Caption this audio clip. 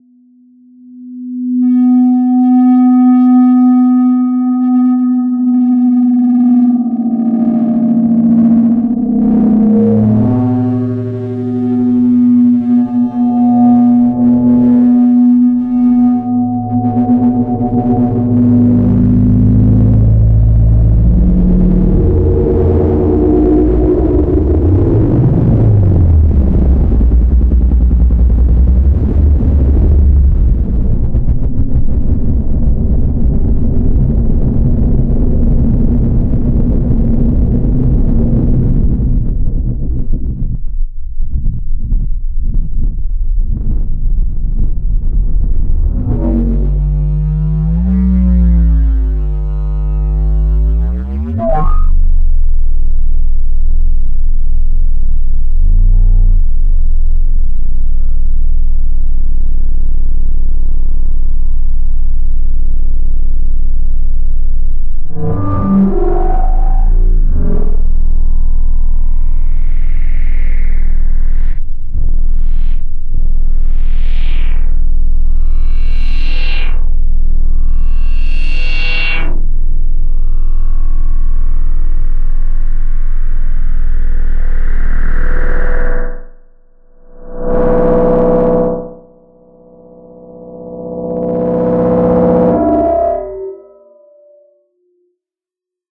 Machine learning - 2
send something through a grain delay, cranked up the feedback and put a limiter after it in the chain, in order to protect my ears.
daw, delay, fx, grain, low-frequent, oppressive